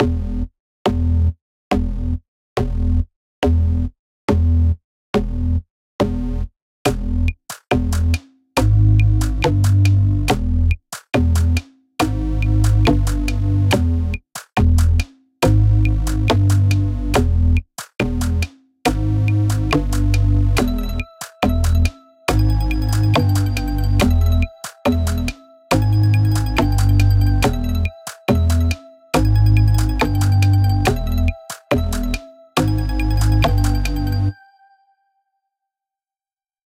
ReDDy Beats — Composition #1
Music, percussion, sound
Music, sound, percussion